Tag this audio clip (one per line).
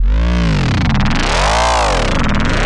bass
bassline
drumandbass
dubstep
neuro
neurobass